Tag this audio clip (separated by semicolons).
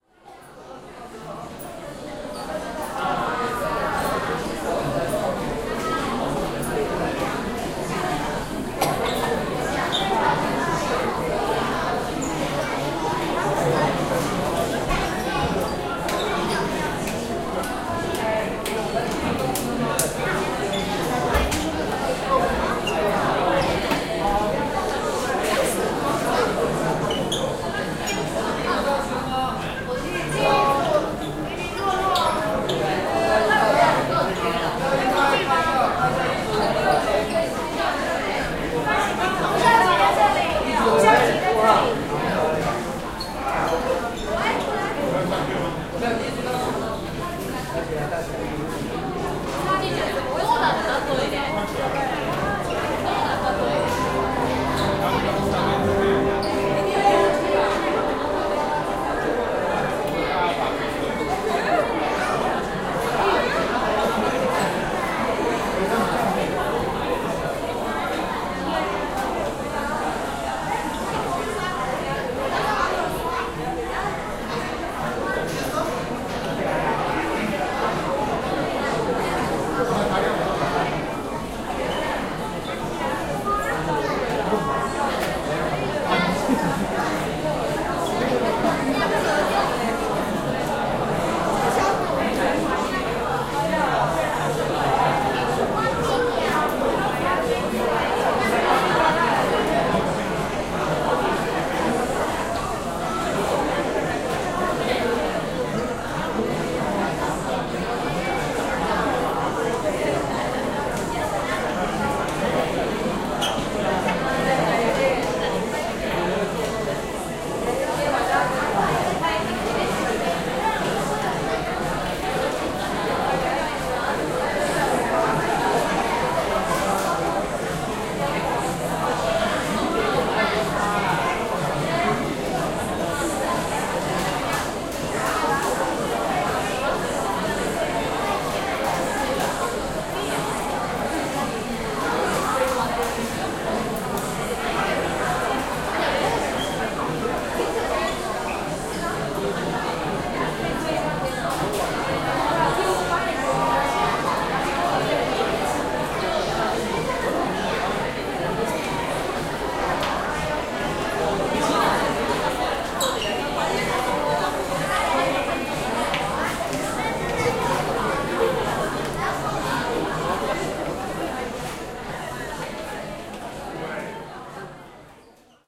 ambience; field-recording; korea; seoul; voice